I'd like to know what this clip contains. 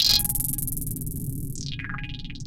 RanDom Synthesized Madness...
acid, alesis, ambient, base, bass, beats, chords, electro, glitch, idm, kat, leftfield, micron, synth